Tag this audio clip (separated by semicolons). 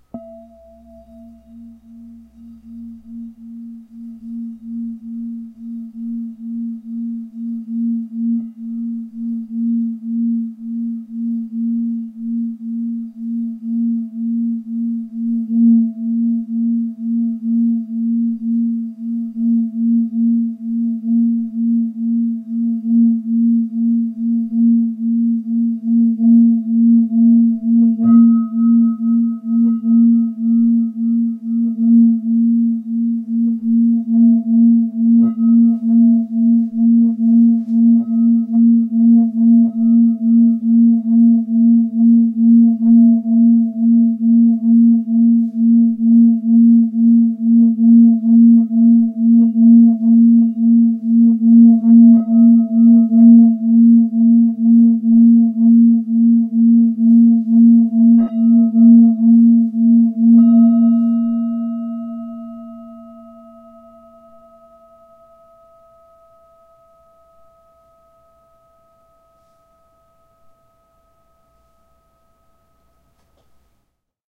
bowl; drone; harmonic; singing-bowl; thalamus-lab; tibetan; tibetan-bowl